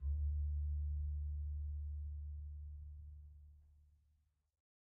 One-shot from Versilian Studios Chamber Orchestra 2: Community Edition sampling project.
Instrument family: Strings
Instrument: Solo Contrabass
Articulation: pizzicato
Note: D2
Midi note: 38
Midi velocity (center): 63
Microphone: 2x Rode NT1-A spaced pair, 1 AKG D112 close
Performer: Brittany Karlson